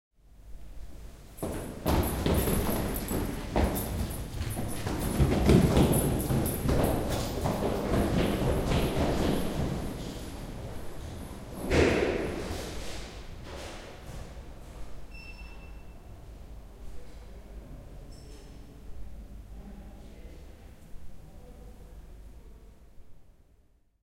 Running down the staircase
Two people running down a staircase in a very acoustic hall.